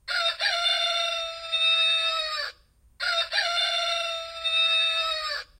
toy rooster
Toy cock or rooster crowing. Vivanco EM35 into Marantz PMD671.
crowing, toy, ringtone, bird, cock, rooster